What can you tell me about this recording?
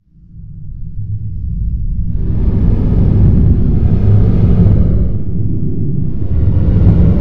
strong wind against frame house
A modification of a file I created as another
user. I crumpled a 2 liter plastic soda bottle
and distorted the sound with various Audacity
effects.
I used delay/decay to change the sound so that
it sounded like high velocity wind against a
wooden dwelling. I also used flange effect to
sweeten the sound.
forceful, gale, nature, stress, wind